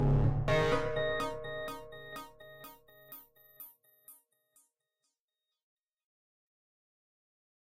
Interesting sample made with granular synthesis.